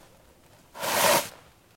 The sound of pulling a tissue out of a box of Kleenex.